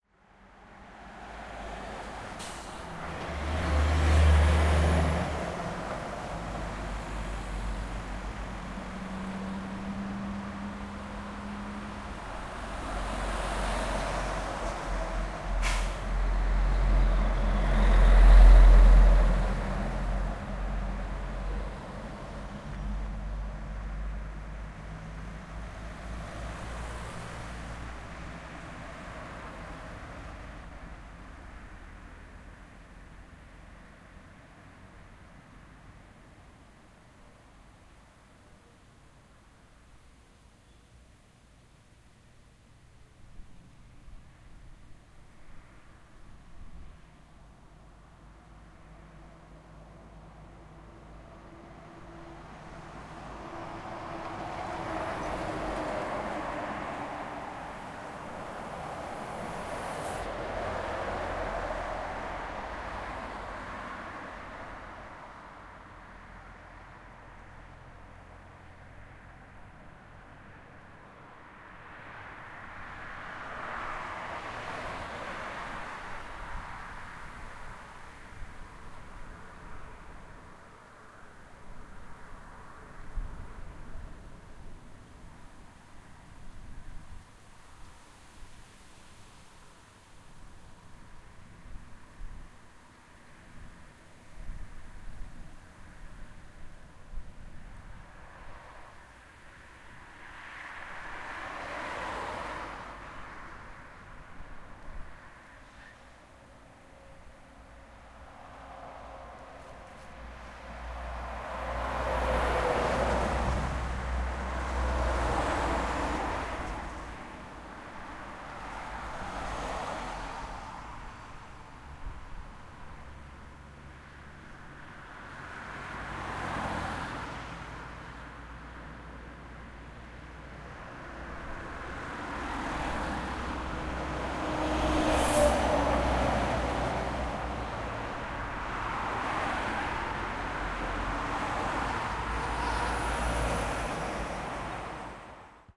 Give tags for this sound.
mostki
poland
passin-by
car
traffic
truck
lubusz
noise
road
fieldrecording

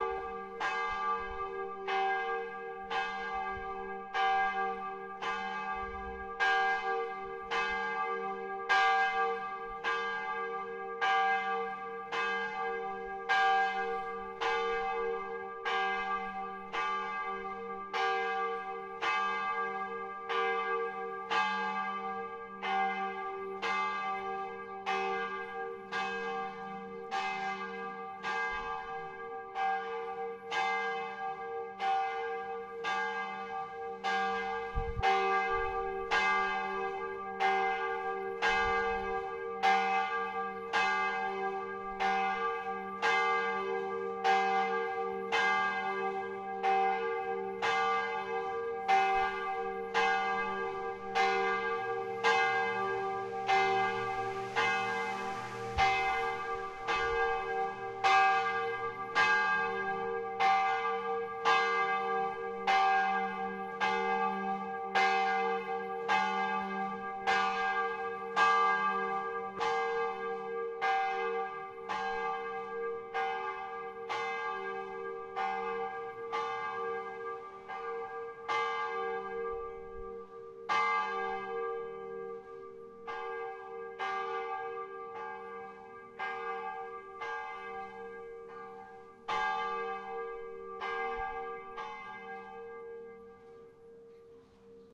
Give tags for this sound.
paris
cloches
Church
bells

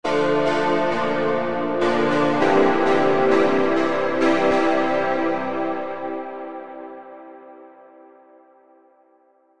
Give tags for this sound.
Faux
Dreamy
Guitar

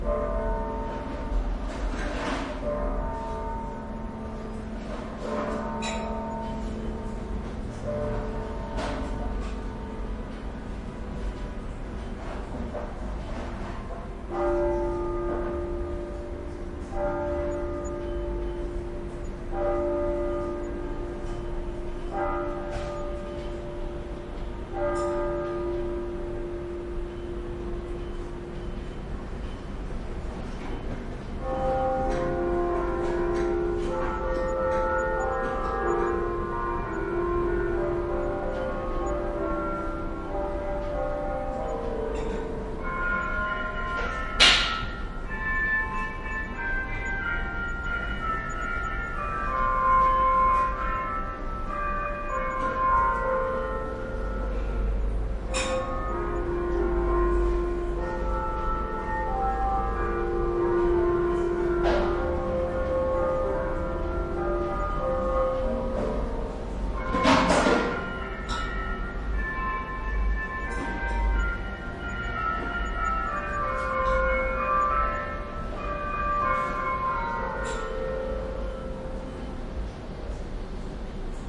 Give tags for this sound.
sagrada-familia
Spain
17h-bell
church-bell
alreves
field-recording
Barcelona
programa-escuta

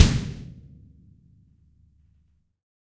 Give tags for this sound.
drum
kick
kit
metal
percussion
rock

